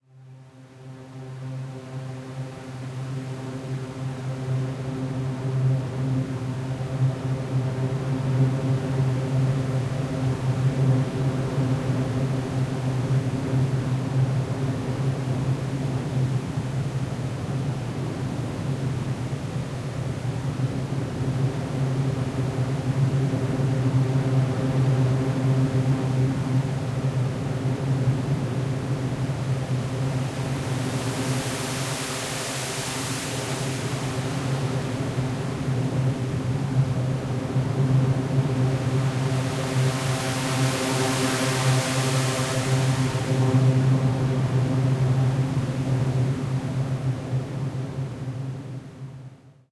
A drone from the desert